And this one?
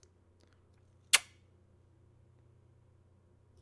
Male short kiss